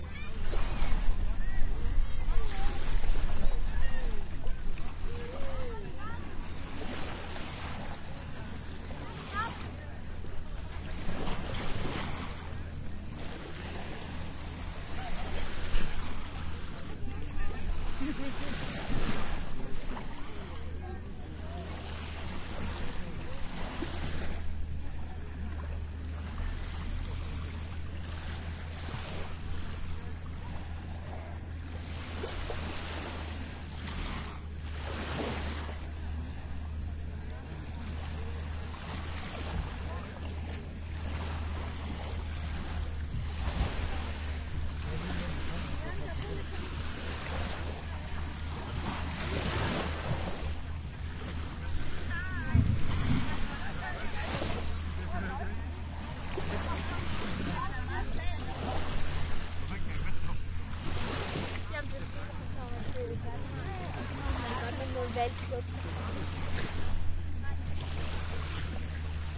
Eastern shore at skagen branch 07-26 01
Recorded at the northern tip of Denmark, as far north as you can get. This recording is on the east coast, and is remarkable as it differs very much from the west coast waves from the same area. Sony HI-MD walkman MZ-NH1 minidisc recorder and two Shure WL183
barking, beach, dog, people, voices, water, wave, waves